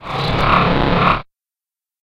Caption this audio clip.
guacamolly swello die3
Small processed chunk of _swello_die2. Short length makes temp distortion less noticeable. Nice grind/bass towards end.
grind, distortion, swell, bass